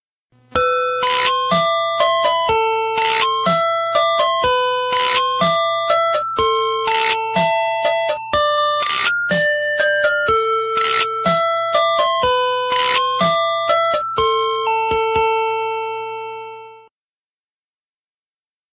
Nichols Electronics Omni Music Box - The Peddler
Here is the next song from the Omni music box. This is mainly known as the theme from the game Tetris, but the official name for this is The Peddler. Hope you enjoy.
Chime, Ice-cream, Song